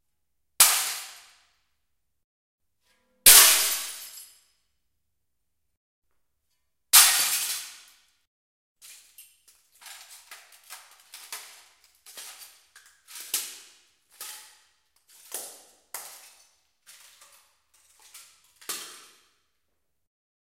Break Mirror003
44.1/16bit, Breaks huge mirrors.